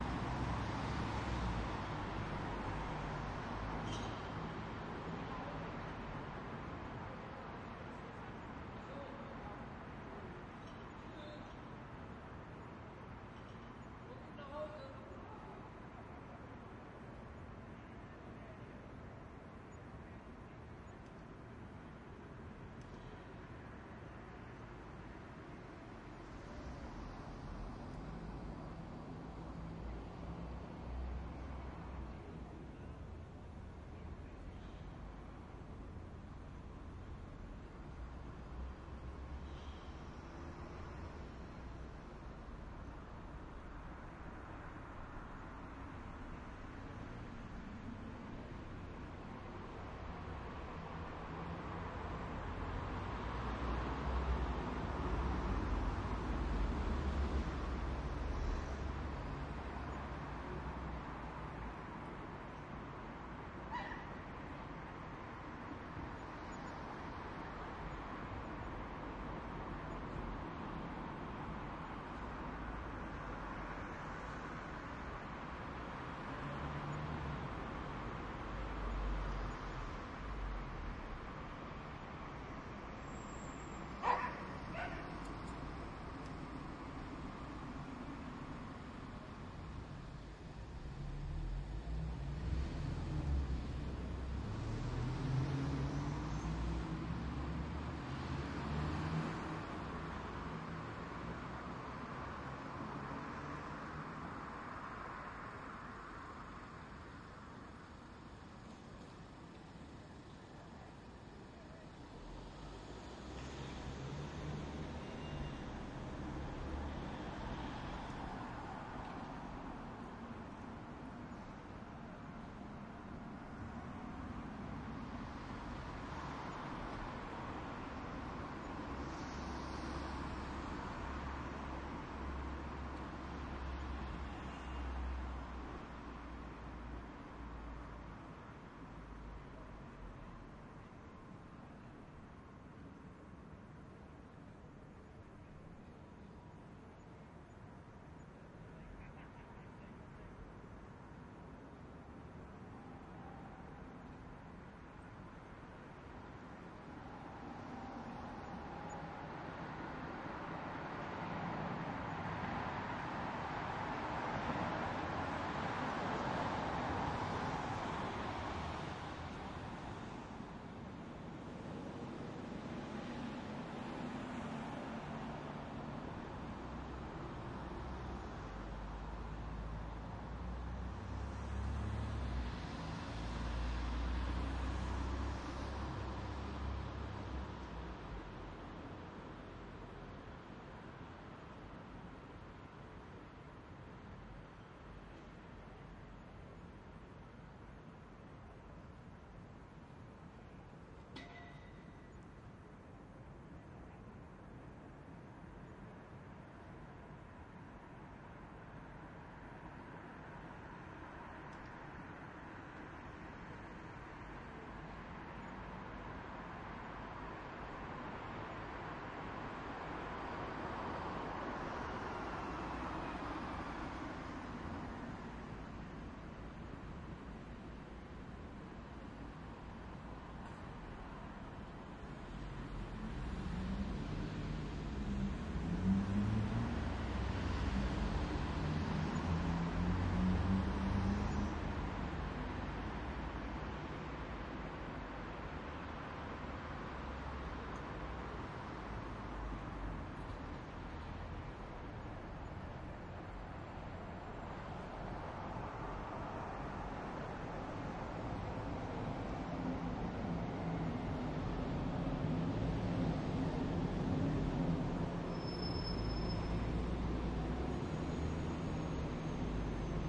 mbnc BerlinBalcony2
Quiet, consistent city ambience: traffic at street crossing, some distant people, a dog. Motor rumble of starting/stopping vehicles, some voices, barking. Recorded with an ORTF/CK91-array into Tascam DR100mk2 (Gain H6, 80Hz-HP), no processing. Recorded on a May evening from a 5th floor balcony (17m above street level) in Berlin Moabit.
Ambience, Atmo, Atmosphere, Balcony, Berlin, CK91, Crossing, DR100, Evening, ORTF, Street, Traffic